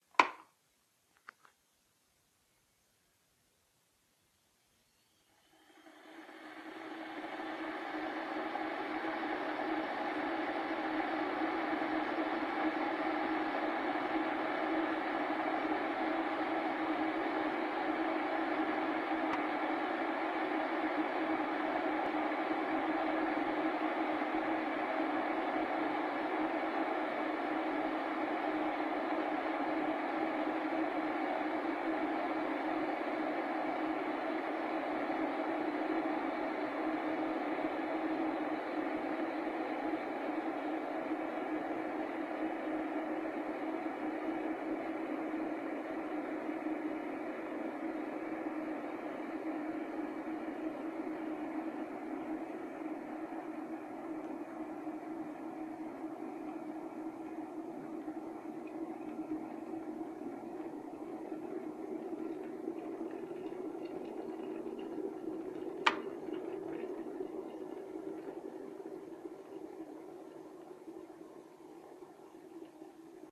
Teapot boiling 2 record20151219011512
sounds of teapot. Recorded with Jiayu G4 for my film school projects. Location - Russia.